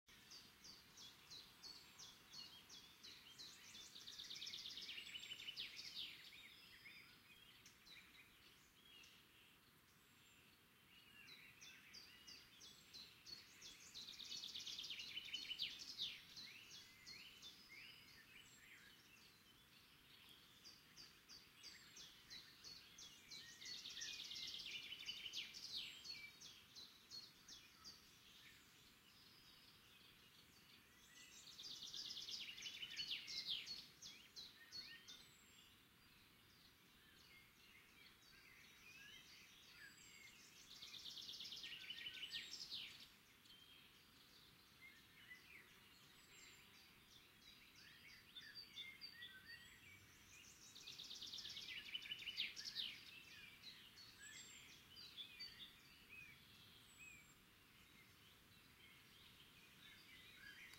Birds in forest (5.1)
Just left my camera in the forest for a minute
1, 5, birds, chirp, forest, nature, surround, woods